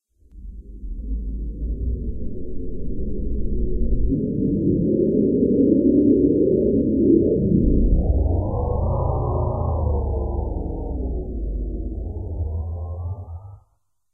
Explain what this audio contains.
Big ship flyby
Large ship flying past. made in fl studio. heavy on sub level bass
sci-fi, spaceship, whoosh